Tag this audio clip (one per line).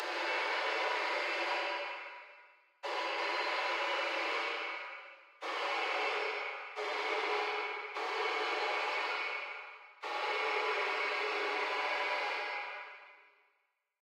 Grinder
Horror
Metal
Metallic
Noise
Scrape